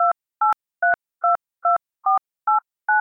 maxime gagneur01
/////description de base ////////
Key sound of cellphone
//////////Typologie (P. Schaeffer)///
N'' : itération tonique
////////Morphologie////////////
- Masse : Groupe tonique
- Timbre harmonique : Eclatant
- Grain : Grain rugueux
- Allure : Allure qui comporte un vibrato dans le son de chaque 'bip'
- Dynamique : L’attaque est violente pour chaque 'bip'
- Profil mélodique : Les variations sont en forme d'escalier
- Profil de masse : Site